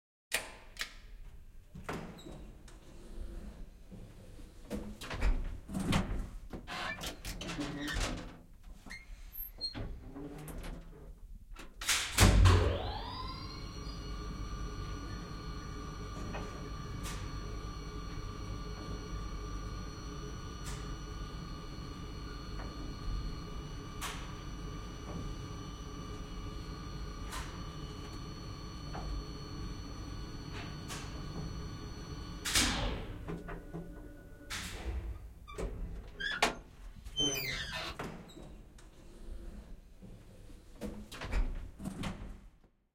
elevetar, lift, stop